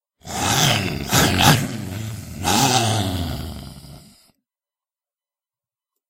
Me growling angrily into my mic to immitate a monster.